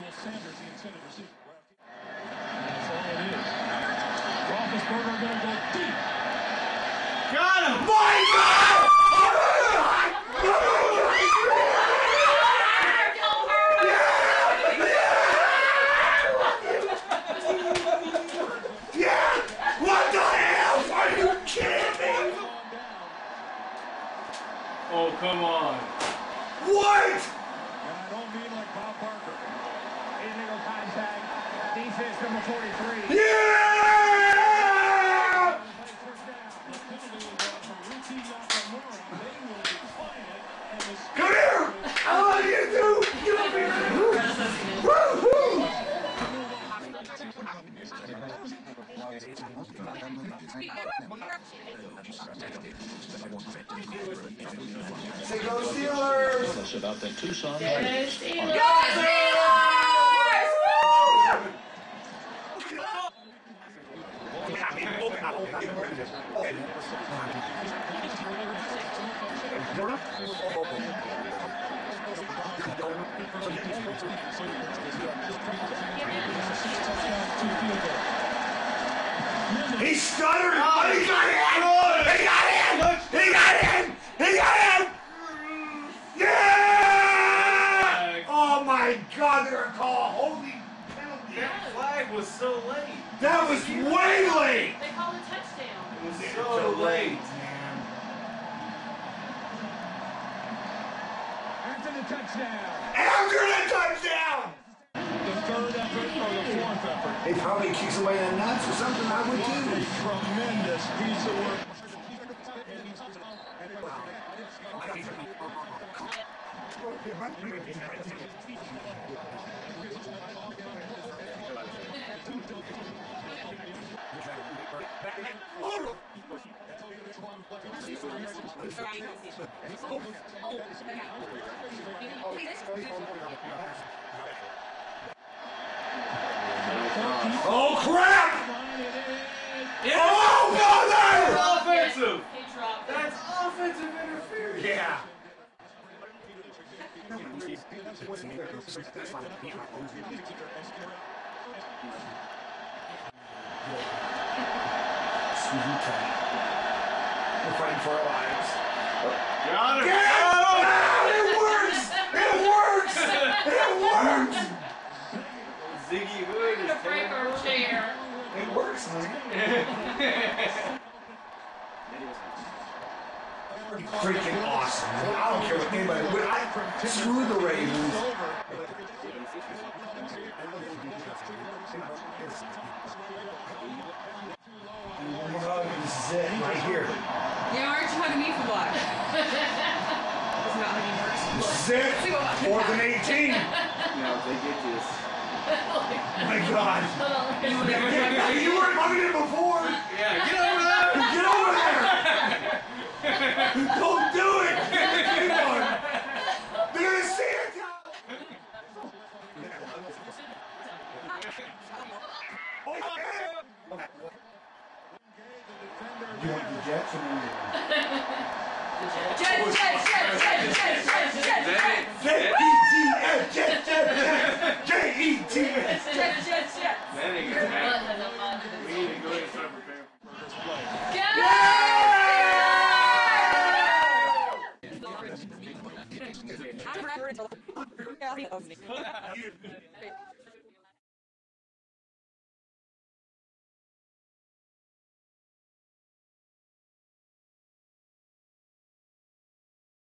Game On TV 1-2
Three rowdy American football fans (Two men, 1 woman) watch a Steelers game in a medium sized living room. Camera microphone, Stereo.
Sports, TV, Viewers, Football, Cursing, Cheering